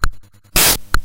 Casio pt-1 "swing" drum pattern
80s, casio, drumloop, loop, pt1, retro, swing